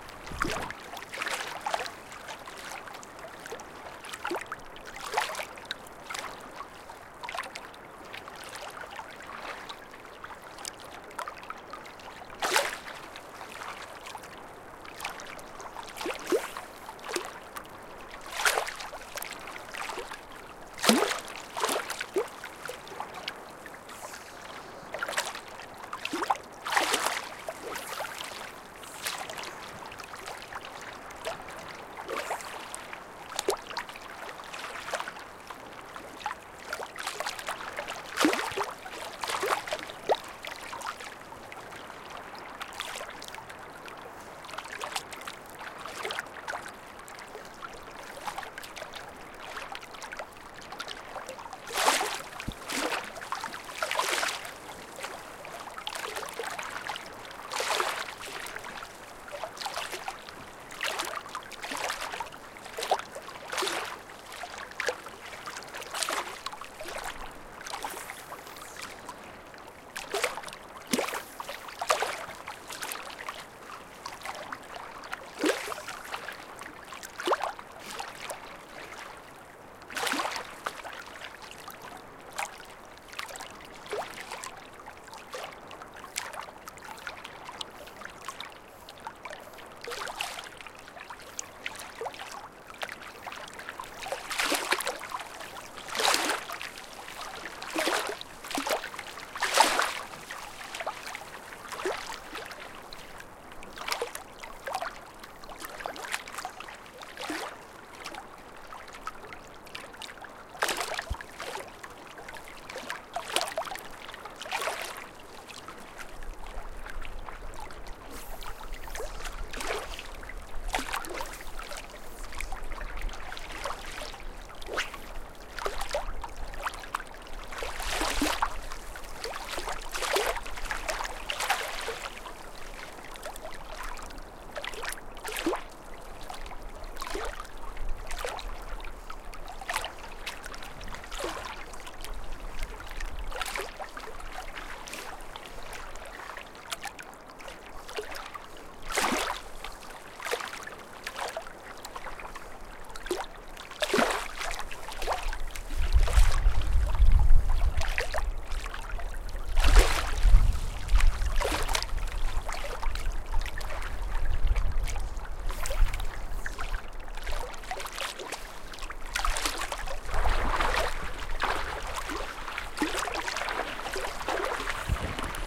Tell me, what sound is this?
Gentle waves lapping against rocks on a lake in Eastern Washington.